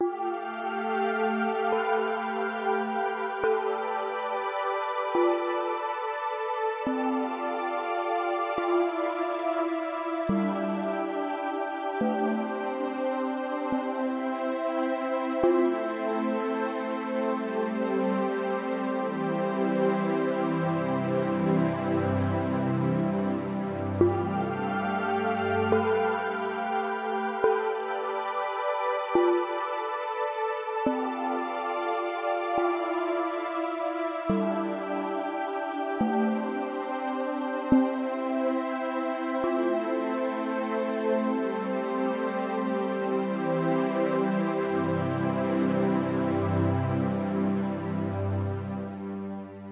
Pour Merlin le lapin
strings, sad, chill, ambient, rabbit